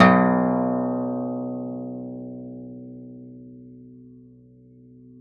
A 1-shot sample taken of a Yamaha Eterna classical acoustic guitar, recorded with a CAD E100 microphone.
Notes for samples in this pack:
Included are both finger-plucked note performances, and fingered fret noise effects. The note performances are from various fret positions across the playing range of the instrument. Each position has 5 velocity layers per note.
Naming conventions for note samples is as follows:
GtrClass-[fret position]f,[string number]s([MIDI note number])~v[velocity number 1-5]
Fret positions with the designation [N#] indicate "negative fret", which are samples of the low E string detuned down in relation to their open standard-tuned (unfretted) note.
The note performance samples contain a crossfade-looped region at the end of each file. Just enable looping, set the sample player's sustain parameter to 0% and use the decay and/or release parameter to fade the
sample out as needed.
Loop regions are as follows:
[200,000-249,999]:
GtrClass-N5f,6s(35)
acoustic, guitar, multisample, 1-shot, velocity